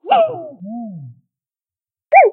I just squeezed a rubber bath toy mermaid and lowered the speed. the last voop the duplicated whoosh sound after the first voop but amplified and higher pitch. so yeah. It kind of sounds like a laser being shot or something
boop, laser-gun